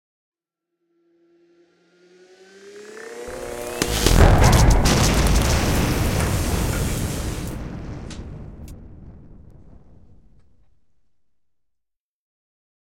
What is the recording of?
Explosion of a Power Central